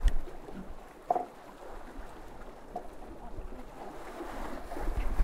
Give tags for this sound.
clatter; ocean; rock; sea; splash; wave; waves

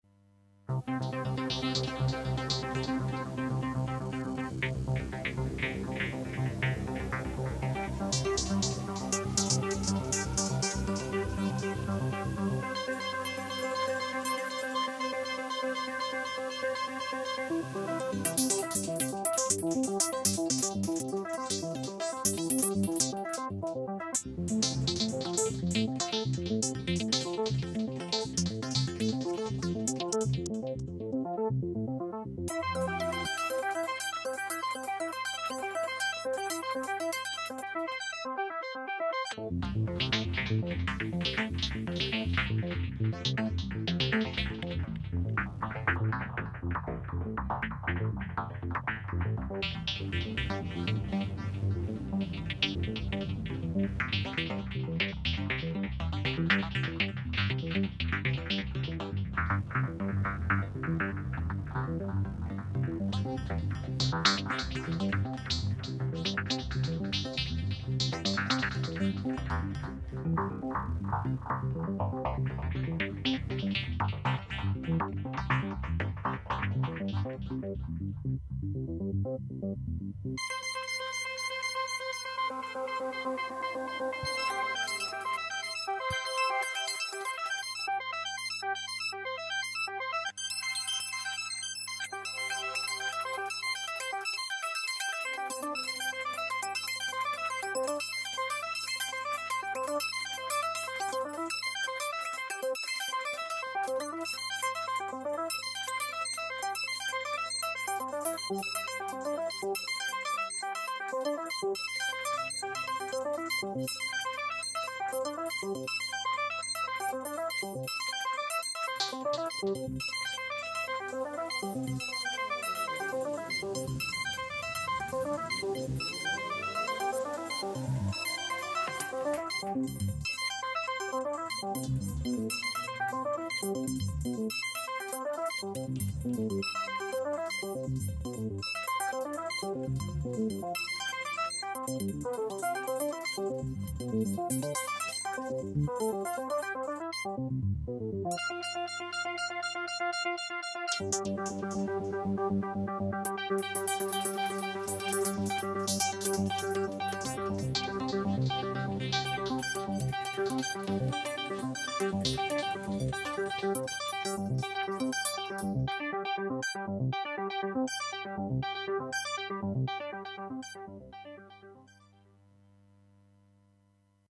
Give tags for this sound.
ambient; Blofeld; drone; eerie; evolving; experimental; pad; soundscape; space; wave; waves

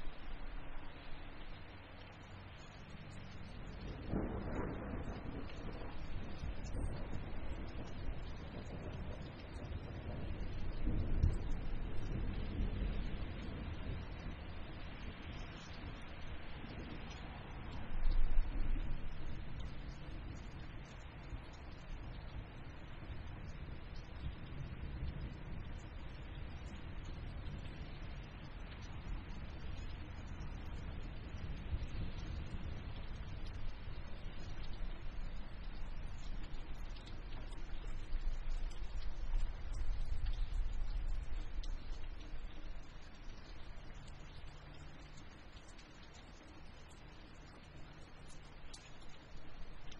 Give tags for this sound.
birds; churping; distant; field; light; rain; thunder

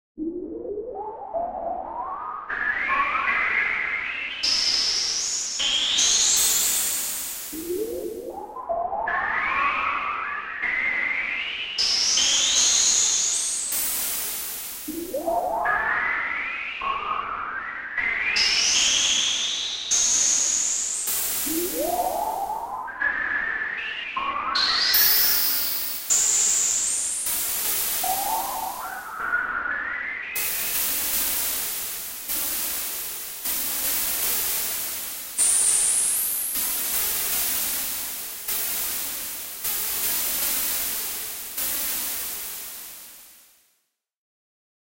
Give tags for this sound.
ambient; sfx; space; synth; universe

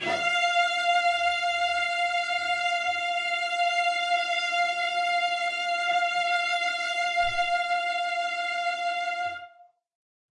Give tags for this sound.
cello; cello-section; esharp5; midi-note-77; midi-velocity-95; multisample; single-note; strings; vibrato-sustain; vsco-2

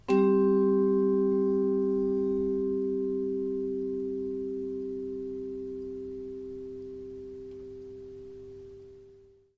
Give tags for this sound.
vibraphone; percussion; chord; mallets

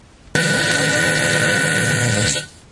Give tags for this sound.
flatulence; gas

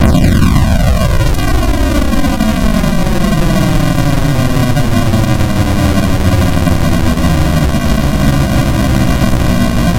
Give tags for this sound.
experimental; electronic; video-game; video; robot; sweep; digital; noise; laboratory; sound-design; drone; duty; ambient; sweeping; 8-bit; sci-fi; loop; videogame; modulation; PWM

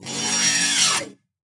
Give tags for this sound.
Buzz; Grind; Metal; Rub; Scratch; Zip

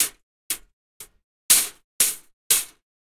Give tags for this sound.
hat; percussion; rake

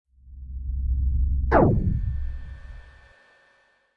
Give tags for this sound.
Pulse; weapon; gun; bass; cannon; laser; build; shot; buildup; up

